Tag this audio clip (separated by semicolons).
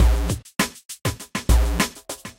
Beat; Idrum; Misc